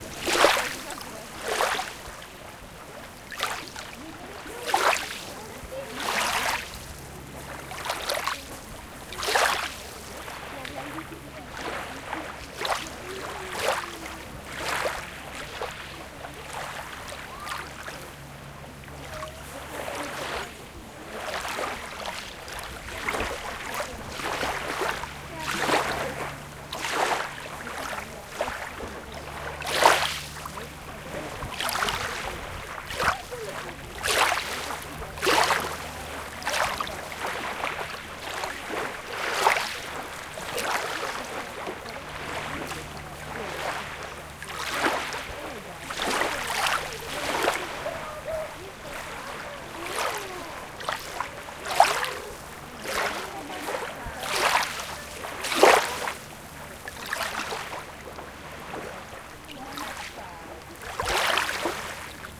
Duna River Beach ZOOM0001
vast, Nature, beach, shore, Peoples, water, Humans, waves, Wind, Spring, Ocean, River, sea, soundscape